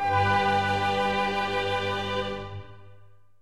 G Chord Orchestal end